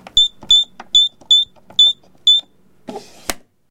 Activating Alarm Buttons Pressed Bleeps
I'm activating an alarm system, typing in the passcode, you can hear 4 beeps. Recorded with Edirol R-1 & Sennheiser ME66.
alarm, alarm-system, alert, atm, atm-machine, bleeps, encryption, home, passcode, pin, pincode, safe, secret-code, swichting-on, turning-on, turn-on, typing, warning